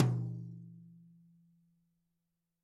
Drums Hit With Whisk